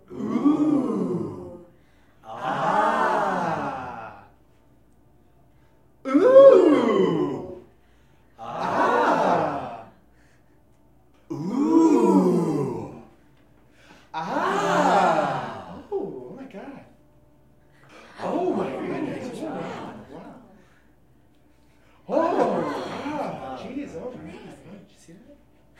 Crowd Ooohs and Ahhhs in Excitement
Excited audience likes what they see.
Perfect for a game show type of piece or for that retro infomercial feel.
Four different oohs and ahhs.
ooh, oooh, unison, comedy, male, live, excited, ah, gameshow, ahh, crowd, female, audience, television, surprise, show, human, ahhh, game, voice